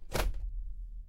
jump in wood.
jump, shoe, step, wood